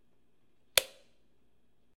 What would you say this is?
Light switch turning on on interior wall.